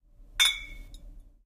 two bottles hitting against each other